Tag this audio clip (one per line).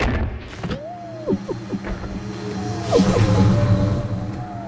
loop
tense
baikal
electronic
atmosphere